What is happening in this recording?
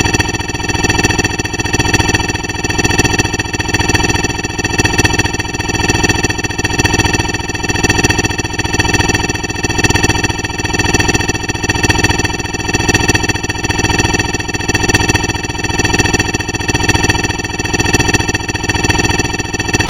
I was asked to create a call signal that 'will wake up the dead'. Alright, here it is.